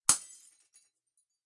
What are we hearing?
Small Glass Break
This is the same sound effect as the other glass break sound effect, but this time, I made it sound like a smaller glass break sound effect. Made by breaking a lightbulb. Recorded from my iMac, but had the noise removed.
break, bulb, glass, light, lightbulb, shatter, small, smash